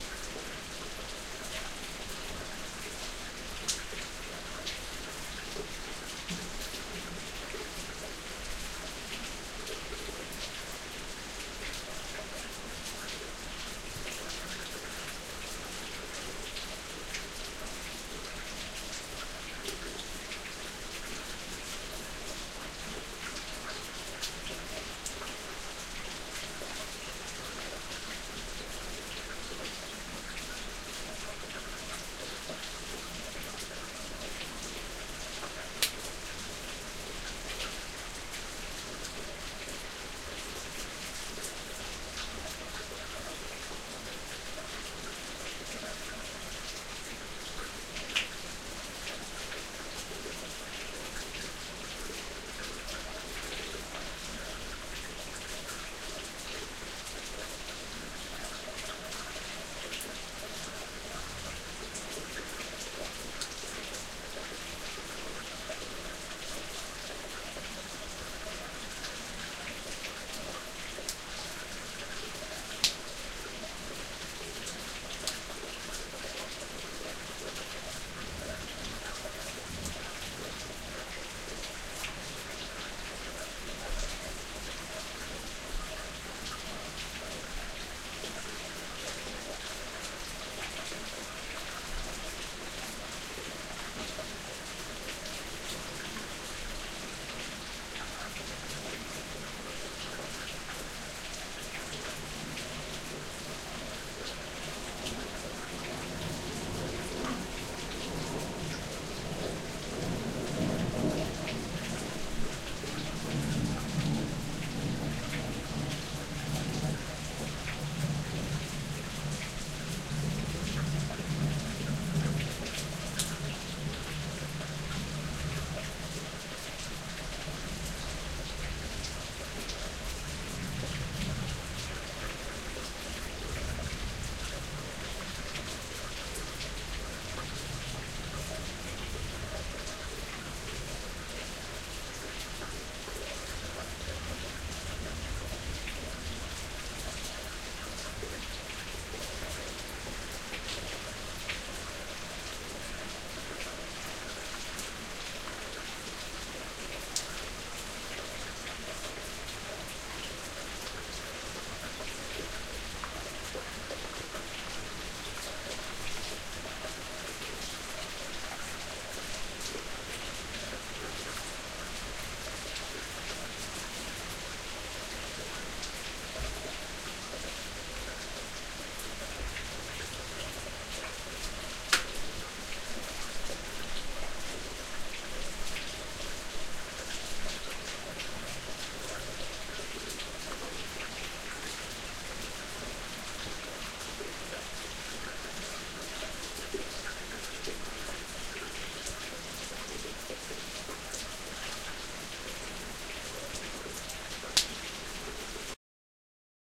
Rain Draining into concrete at night
Recording of rain outside onto concrete at night.
foley, nature, weather